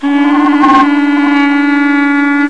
Double clarinet at the very
begining
of an improvisation. Recorded as 22khz
clarinet, detuned, invented-instrument, handmade, gourd